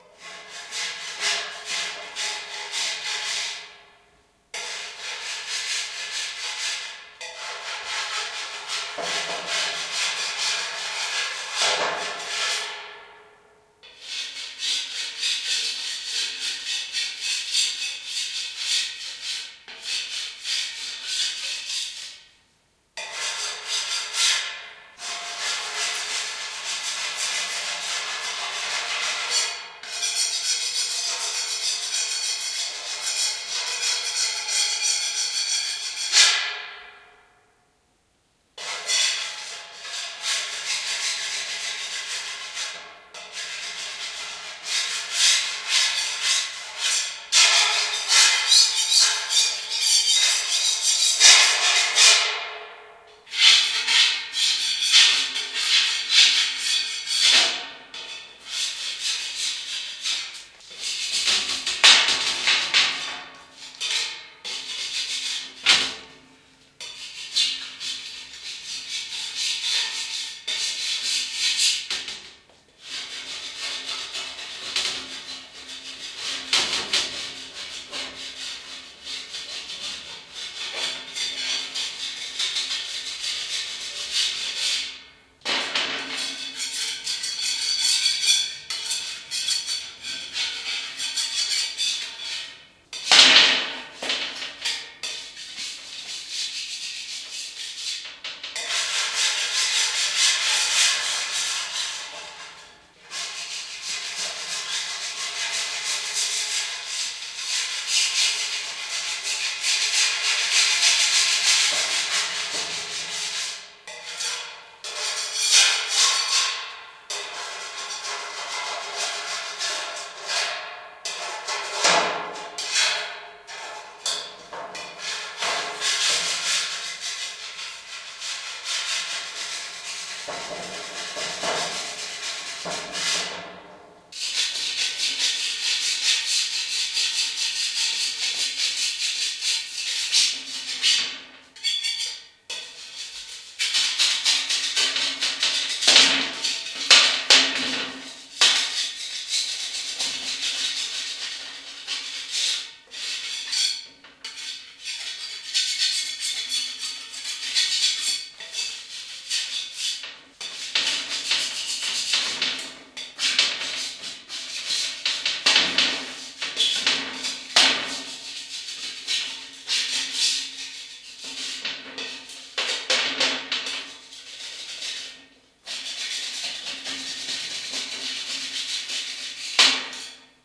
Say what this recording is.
Stripping Paint from Metal Sheet
In order to powder coat a rusting, slightly beaten set of metal lockers I found in a skip to use for an art project, I had to deconstruct each section and strip the original paint from every inch of the 17 separate panels.
This laborious process took around 6 months, but meant a significantly cheaper quote to finish the lockers in a new, uniform colour.